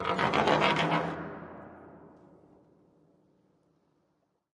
Recordings of different percussive sounds from abandoned small wave power plant. Tascam DR-100.